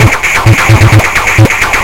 FLoWerS 130bpm Oddity Loop 006
Very hi-resonance kicks and noise. Only minor editing in Audacity (ie. normalize, remove noise, compress).